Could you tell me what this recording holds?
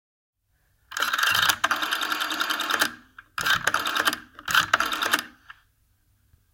A sample of and old phone with rotary dial when dialing 911. Recorded with samsung mobile phone. Some ambient noise is heard.
911, dial, phone, rotary, telephone